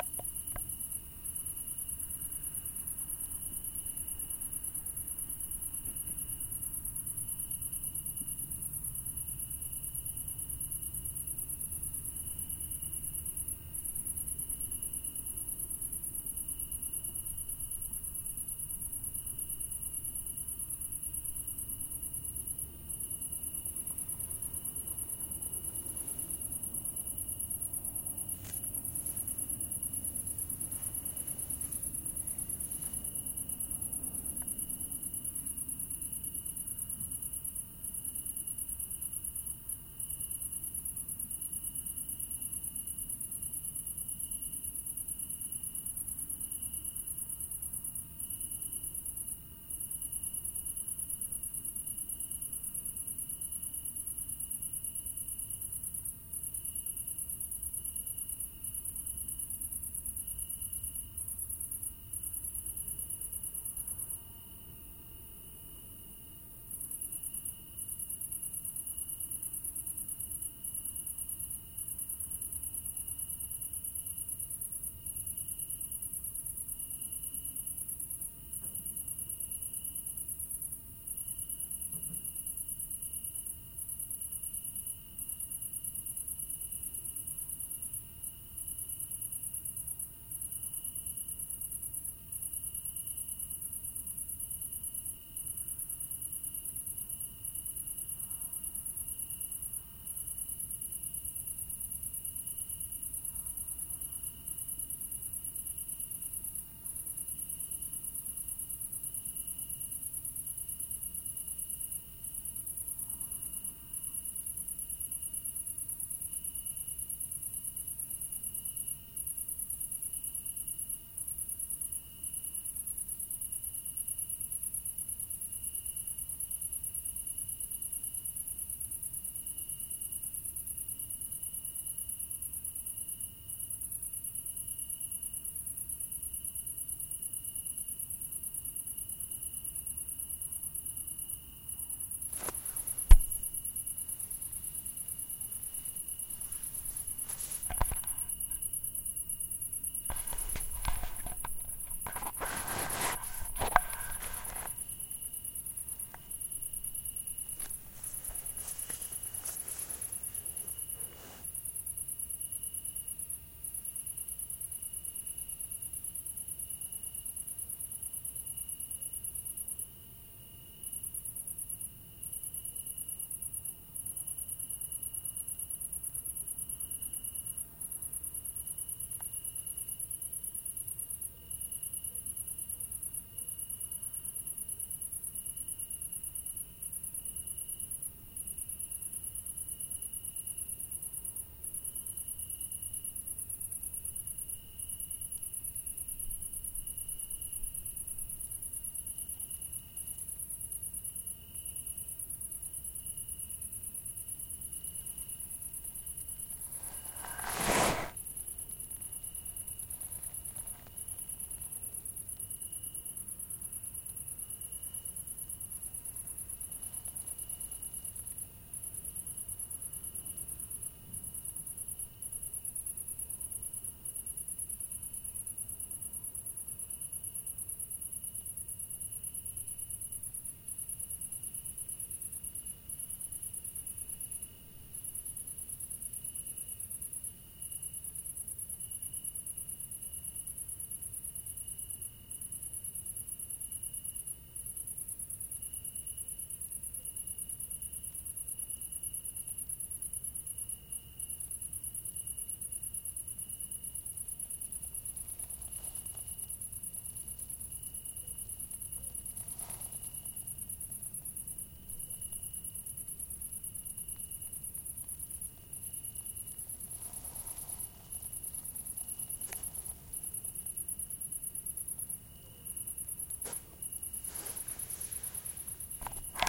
Grillen und Geräusche am Abend
a good record of some crickets in a very calm environment
ambiance, crickets, field-recording, insects, nature, night, Pyrenees, summer